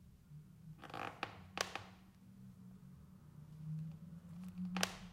squeaking wooden floor 2
crack, floor, squeak, wood, wooden